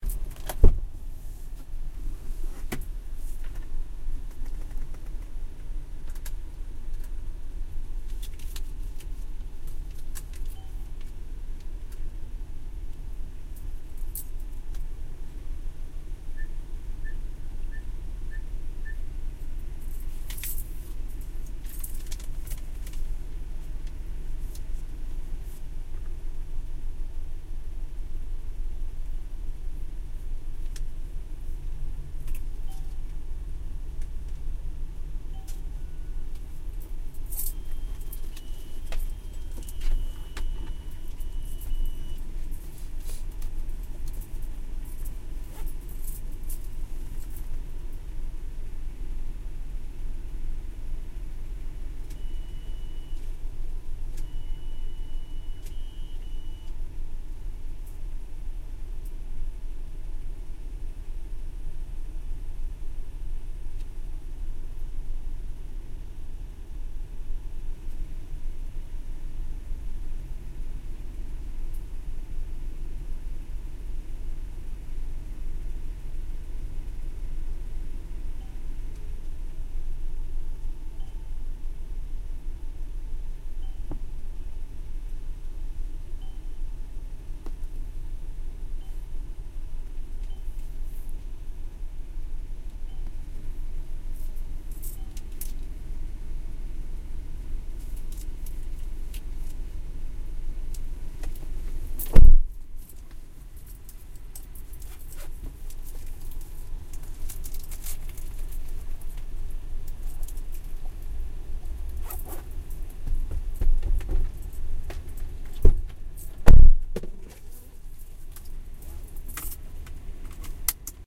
A recording of using the drive through ATM. Sorry for the distortion, perfect example of having the levels far too high.